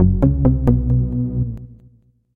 Generic unspecific arftificial mysterious sound effect that can be used for games e.g. for doing something secret
agent, jingle, mysterious, effect, game